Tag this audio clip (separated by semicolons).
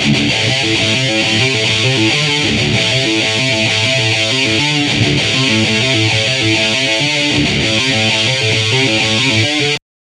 guitar; groove; thrash; metal; rock; heavy